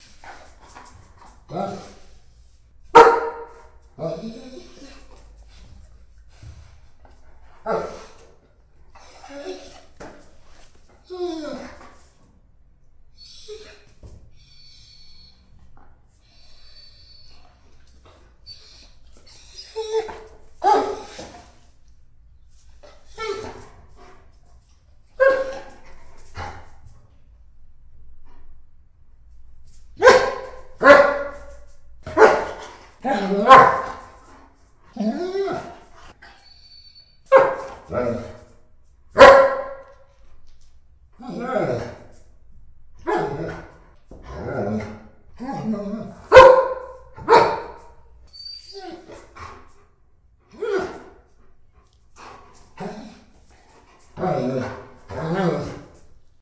Impatient whimpers and barks
Combination of impatient dog's whimpers and barks. Recorded in a garage. No one was harmed during the recording of this sound.
Vivanco em35 -> iRiver IFP-790.
dog, dogs, barking, barks, impatience, whimpering, whimpers, whimper